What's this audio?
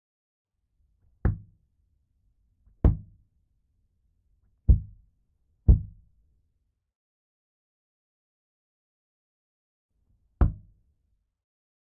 Pounding on glass
Someone pounding against a glass window
foley, glass, pounding